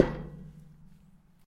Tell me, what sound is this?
Muffled metal hit
Recorded with a Sony PCM-D50.
Hitting a metal object.
dampened, percussive, muffled, hitting, hit, metal